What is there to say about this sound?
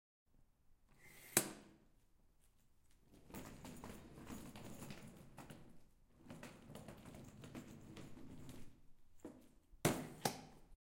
Rolling a suitcase on tiles
Suitcase rolling on tiles in an interior space. Recorded with a Zoom H6